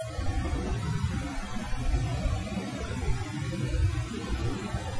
brown noise - denoised 2
alien toilet, denoised two times brown noise
alien, denoiser, effect, noise, toilet